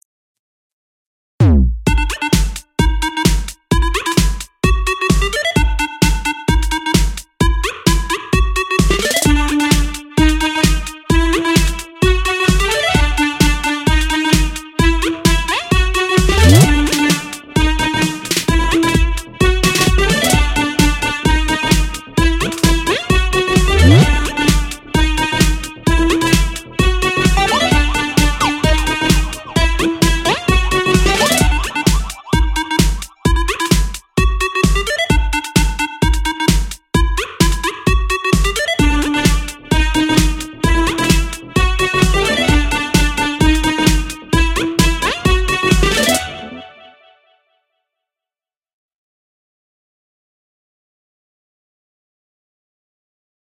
A minimal moombahton trance loop sample